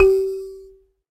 SanzAnais 67 G3 bzzfun
a sanza (or kalimba) multisampled with tiny metallic pieces that produce buzzs